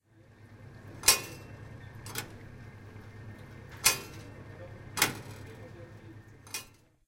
This sound was recorded in the UPF's water fountain at "Tallers" building. It was recorded using a Zoom H2 portable recorder, placing the recorder next to the pedal of the water fountain.
We can hear the sound of hitting and releasing the pedal two times.